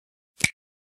Different Click sounds